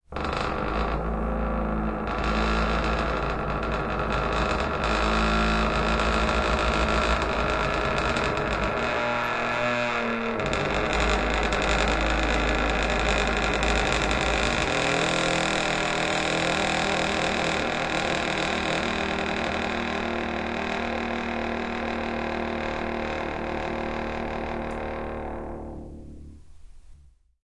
door creak 5 long
door creaking long version
recorded with a EDIROL R-09HR
original sound, not arranged
door, house, creak